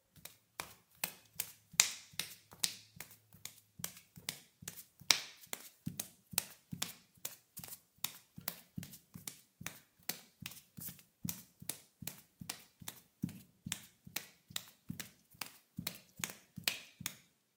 01-11 Footsteps, Tile, Male Barefoot, Medium Pace
Barefoot walking on tile (medium pace)
barefoot, footsteps, jog, kitchen, linoleum, male, medium, pace, tile, walk, walking